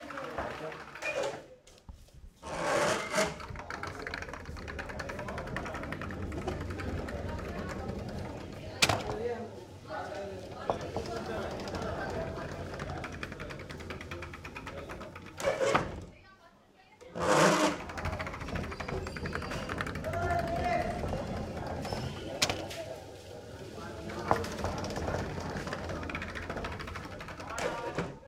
Hospital Sliding Door Open & Close with general ambiance v2
Recording of Hospital Sliding Door with general ambiance.
Recorded with Rode NTG 2 mic, recorder used Zoom h6
close, closing, creak, door, doors, hospital, open, opening, sliding-door, squeak, squeaky, wooden